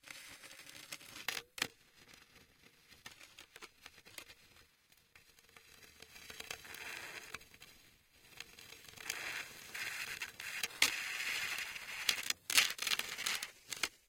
Queneau frot metal 13
prise de son de regle qui frotte